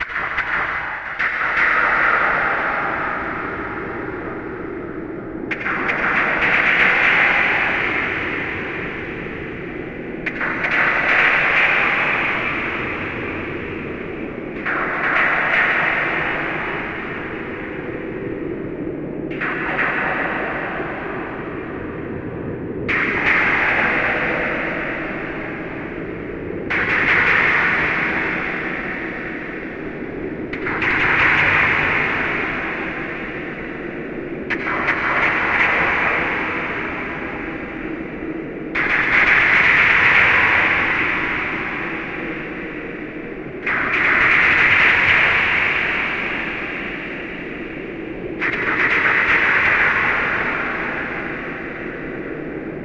These amazing space FX thunderclap sounds were created in Ableton Live, using a signal chain involving a feedback loop.
The original sound was me clapping my hands or snapping my fingers.
The was captured by a cheap webmic, passed through a noise gate and fed into Destructonoid (a VST audio triggered synth by RunBeerRun), then the signal goes through ComputerProg (a sequenced gate VST by RunBeerRun) a flanger and a delay echo then to the sound output.
A feedback loop picks the signal up after the delay mentioned above, adds a second 100% wet delay at 4/120, feeds into DtBlkFX (set to some pitch shifting effect), then there is a gain boost and the signal is fed back to the audio input of Destructonoid.
Wonza !
RunBeerRun, signal-chain, Ableton-Live, feedback, feedbacking-loop, computerprog, feedback-loop, cheap-webmic, DtBlkFx